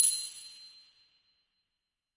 Ceramic Bell 11
bell, ceramic